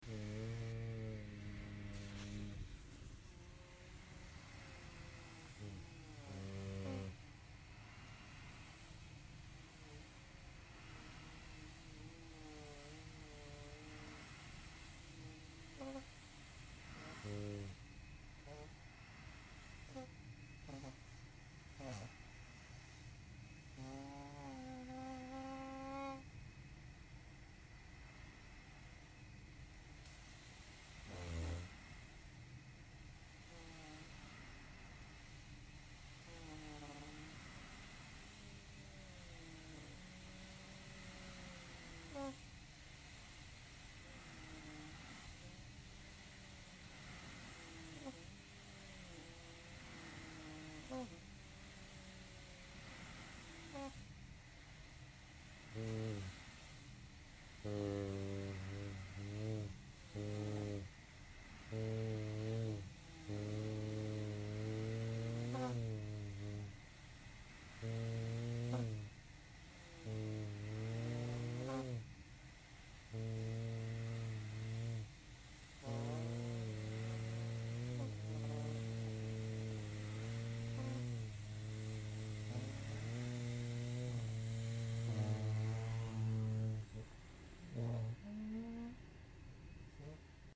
Tony night Wookiee tooting-
This is audio I recorded of my husband using his ten year old decrepit Constant Positive Air Pressure sleeping machine. I was trying to convince him that he needed a new one. The variety of sounds he and it managed to make is extraordinary. The intermittent beeping is the machine's warning sound.
When I played it for him he cried laughing. I hope you find it useful for something.
You'll be please to know his new machine is whisper quiet.
alien, apnea, bed-recording, breathing, choke, CPAP, fart, toot, wheezing, wookie